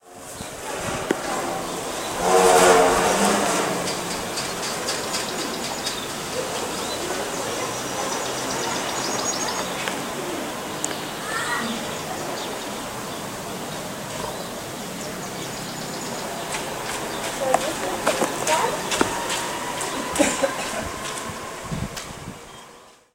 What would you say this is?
E.E.S.N°4 “Bicentenario de la Patria”
Alumnos de 5° año orientación Economía
Proyecto: “¡De qué pueblo sos!”
Materia: Geografía
Docente: Andrea Mundiñano
Autor: Micaela
Título: “Molinos”
Lugar: Av. Belgrano y Pringles
Fecha/hora: 5/11/2015 16.55hs
+ Info: Escuela de Educación Secundaria Nº4 • Cabildo
+ Info: Sonoteca Bahía Blanca
Patrimonio-Inmaterial, Cabildo, Paisaje-Sonoro, Fieldrecording, Mapa-Sonoro, Soundscape, Bahia-Blanca